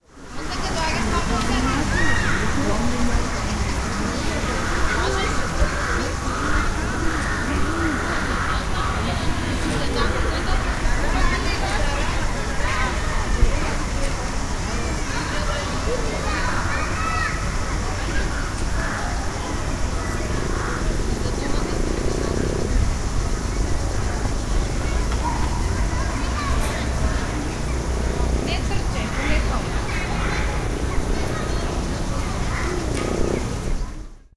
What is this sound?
Children playing, yelling, people walking pets, dusk, at a park, recorded with a Zoom H1 with a compressor on.